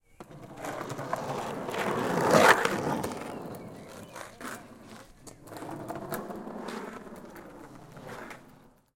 Skate pass on road 5
Long board stake, hard wheels. Recorded with a Rode NT4 on a SoundDevices 702
asphalt, birds, long-board, pass, road, skate